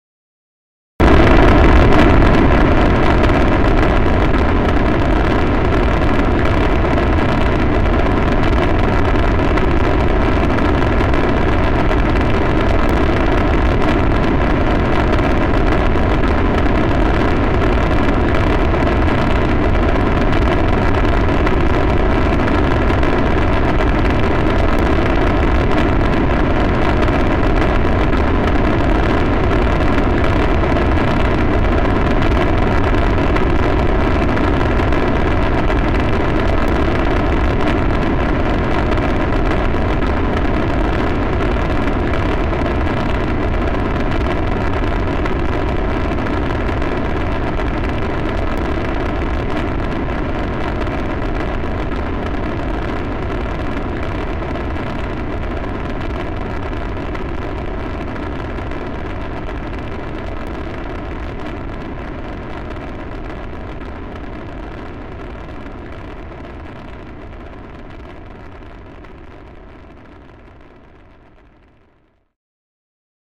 Space Shuttle Launch
I was fooling around with a brown noise, when suddenly I heard that distinctive, unmistakable rocket launch sound you hear in vintage footage. With a little more manipulation, I got this. Almost the real thing, but the highest quality!